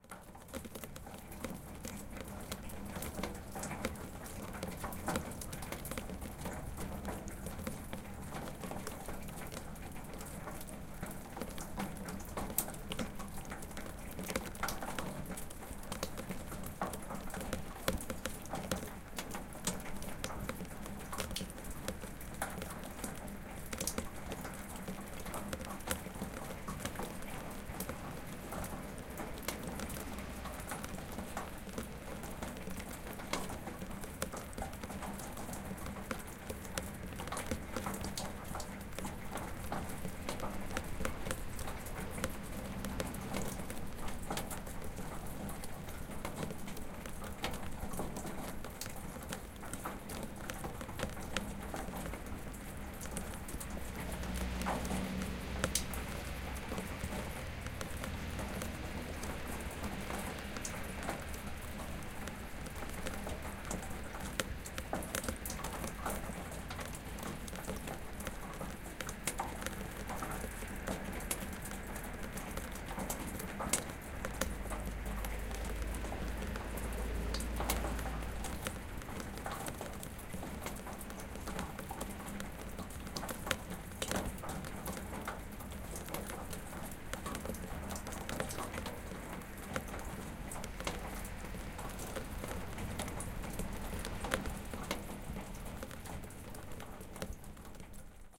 23.12.11: about 5 p.m. sound of meltwater streaming down inside the plastic tube. Sobieszow in the south-west Poland. recorder: zoom h4n. fade in/out
water, stream-down, fieldrecording, tube, meltwater, fall, pipe